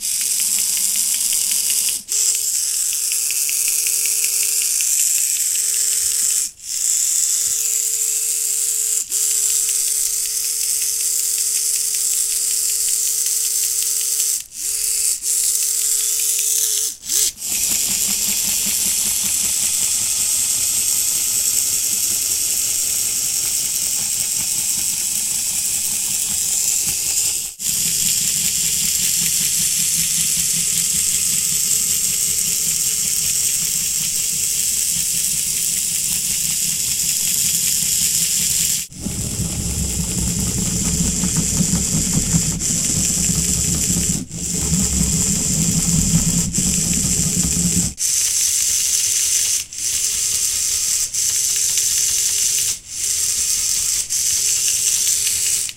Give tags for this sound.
step; power; electrical; battery; plastic; movement; cyberpunk; engine; electronic; electric; robotic; robots; volt; game; ampere; robot; cyborg; hydraulic; remote-controlled; batteries; sound

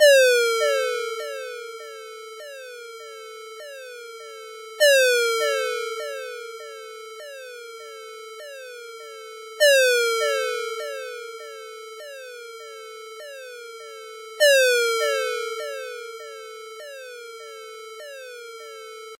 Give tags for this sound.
ringtone; alarm; ring; cell-phone; mojomills; ring-tone; cellphone; phone; alert; mojo; alerts; cell; descend

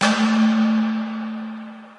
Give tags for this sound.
edited; natural-ambiance; pitched-percussion

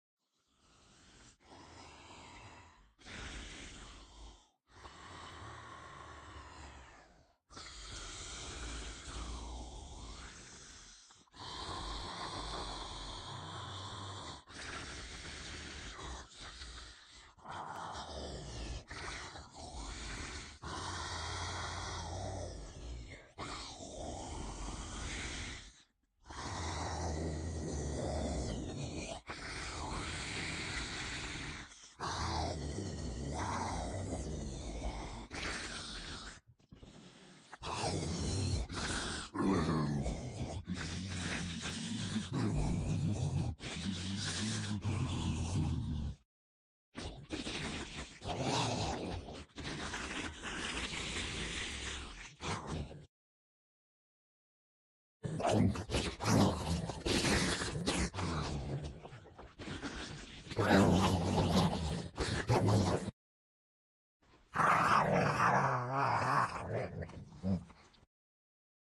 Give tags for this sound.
blood; dead-season; gargle; ghoul; groan; kyma; moan; undead; zombie

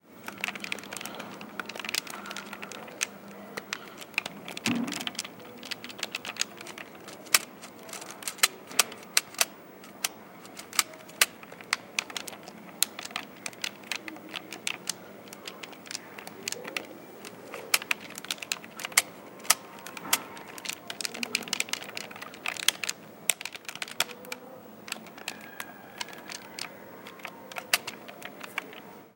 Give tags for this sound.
game; nintendo; gamepad; sound-sound; buttons

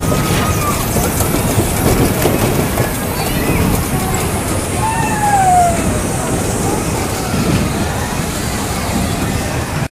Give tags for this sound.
ambiance; field-recording; ocean-city; wonderland

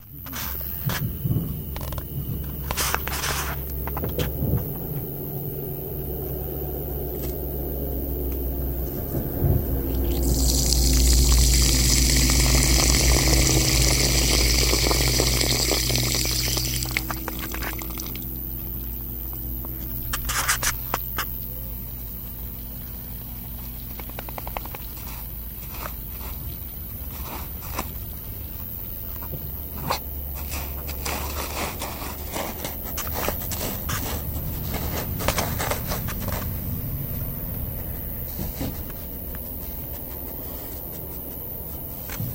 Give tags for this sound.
dragnoise; fluids; liquid

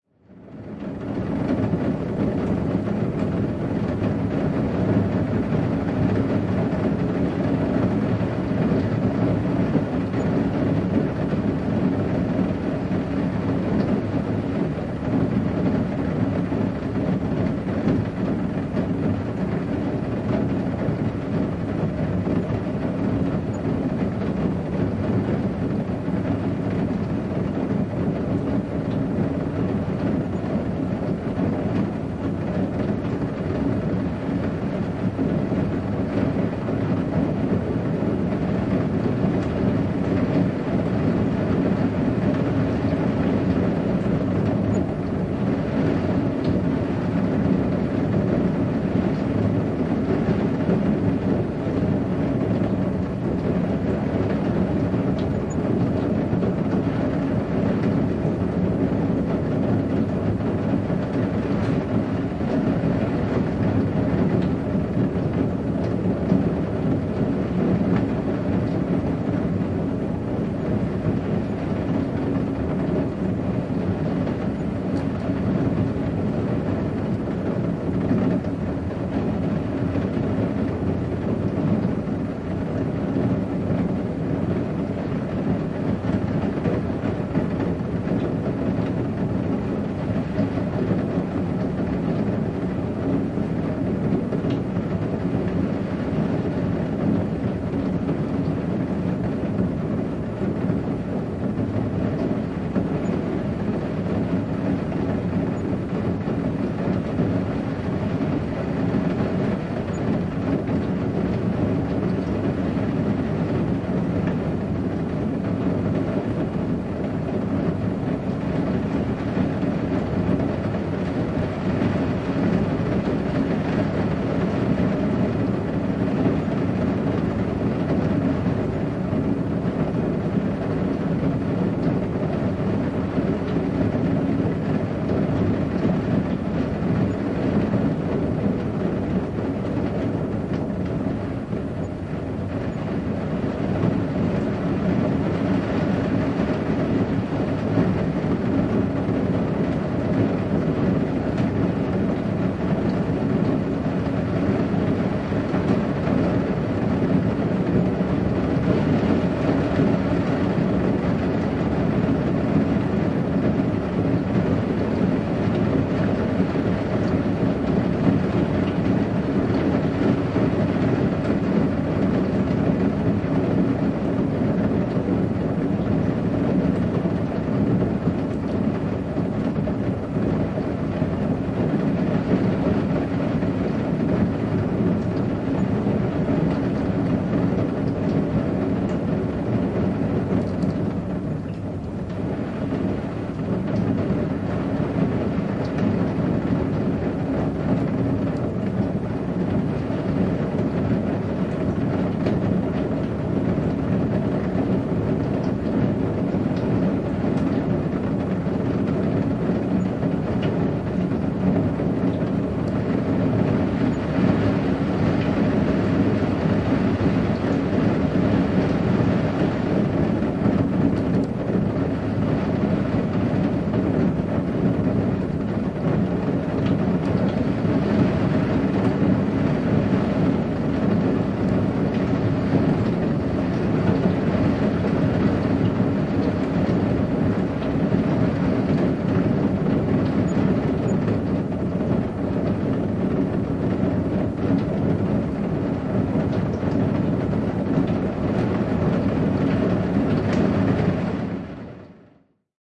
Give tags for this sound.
Field-recording; Finnish-Broadcasting-Company; Ikkunalauta; Interior; Metal; Pelti; Rain; Sade; Soundfx; Suomi; Tehosteet; Windowsill; Yle; Yleisradio

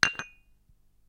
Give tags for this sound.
cup; small

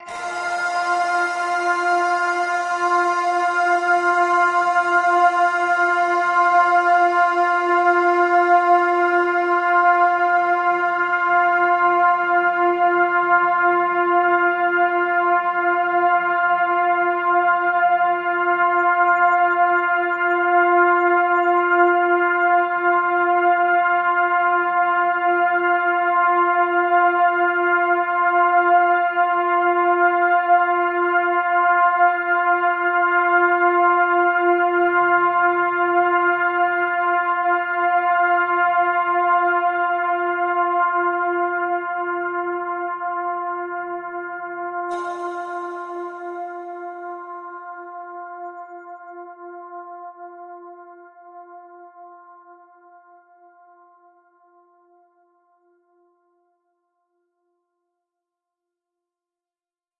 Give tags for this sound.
multisample; organ; pad; ambient; soundscape; space

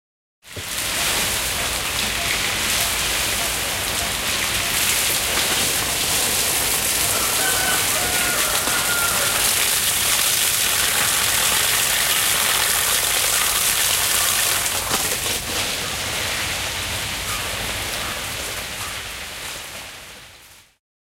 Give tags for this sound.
Farm; Field-Recording; Watering-plants

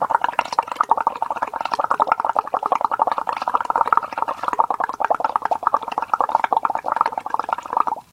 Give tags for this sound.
blow; bubble; bubbles; cup; empty; liquid; pop; water

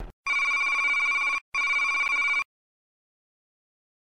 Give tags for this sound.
space; ring; fantastic; modern; sound

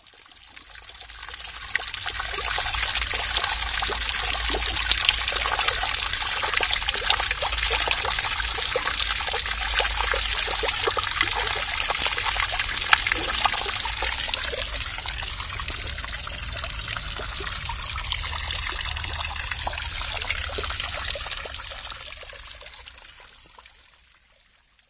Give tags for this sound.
drops; ulp-cam

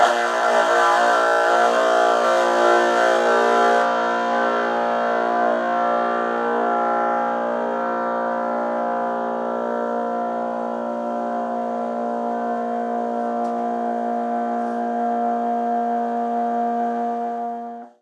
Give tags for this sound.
chords; fuzz; guitar; overdrive; power-chord

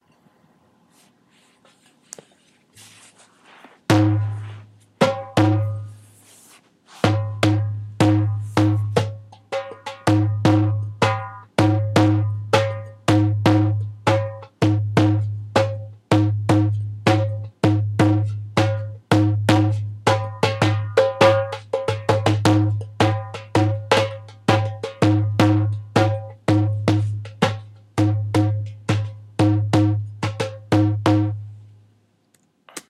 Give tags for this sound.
percussion; loop; doumbek; drum

